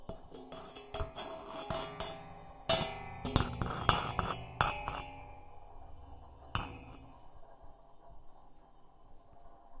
Scraping a metal pipe near a pressure pump or something. Recorded in stereo with Zoom H4 and Rode NT4.